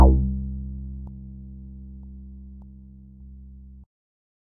Sample02 (acid-B- 3)
Acid one-shot created by remixing the sounds of
303, synth, tb, one-shot, acid